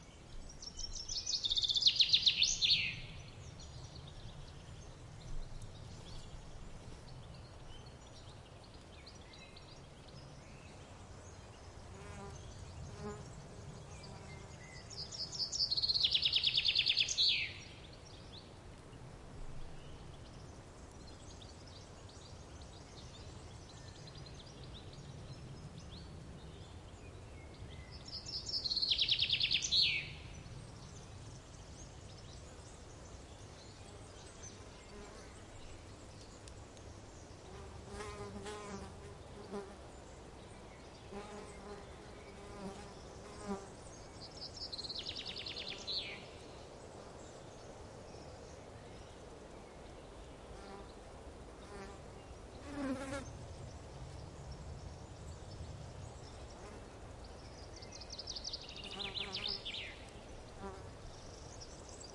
20060620.ambiance.forest.summer02
ambiance of Mediterranean pine forest in summer, bird in foreground / ambiente de pinar mediterraneo en verano, con un pajaro en primer plano
birds, cicadas, flies, nature, summer